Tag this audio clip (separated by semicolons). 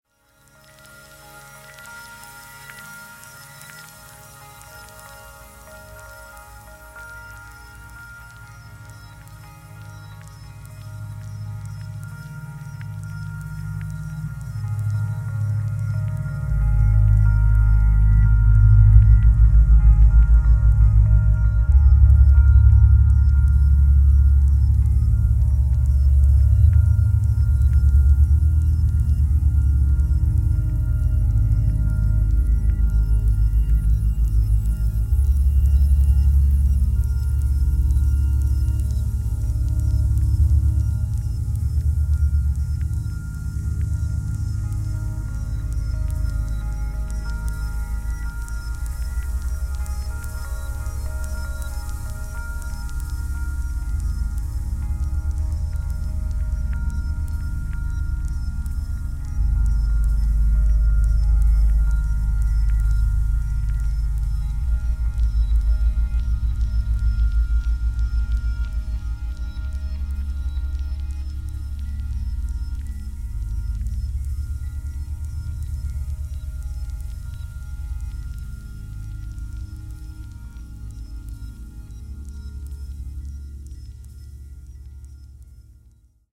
ambience; atmosphere; cinematic; electro; electronic; processed; sci-fi; synth